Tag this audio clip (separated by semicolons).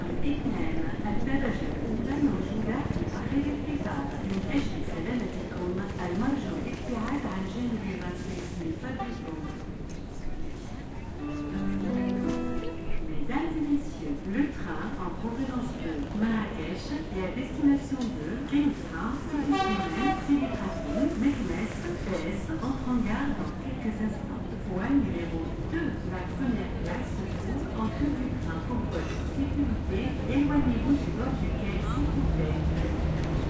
announcement; platform; railway; station; train